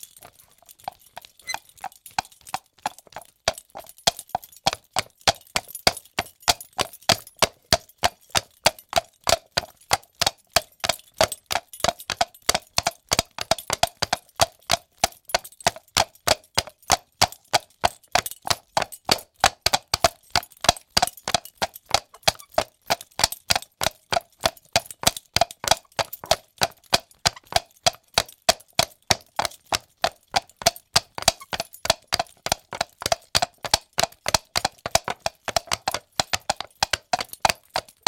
riding horse foley
foley sound from a horse galloping in the street, recorded with an shotgunmic NTG-3
bridle, coconut, film, foley, gallop, hooves, horse, reigns, riding, street